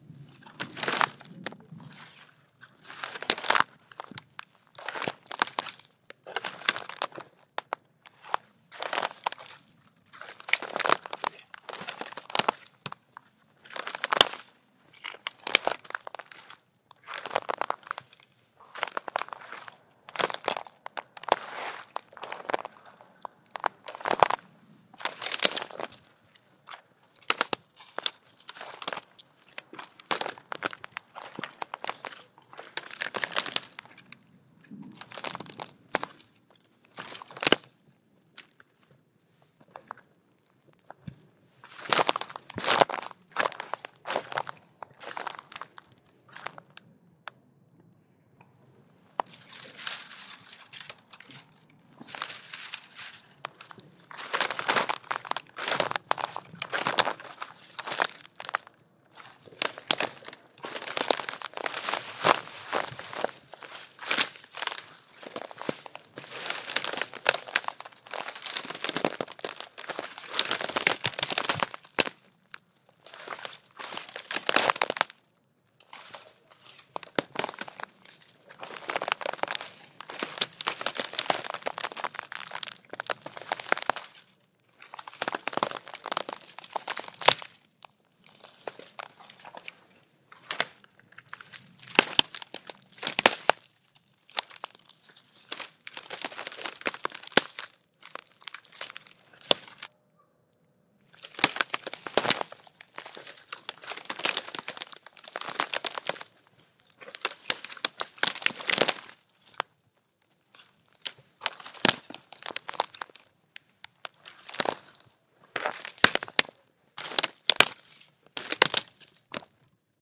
forest stick sticks wood crackle snap step squash squashing break breaking
break, breaking, crackle, forest, snap, squash, squashing, step, stick, sticks, wood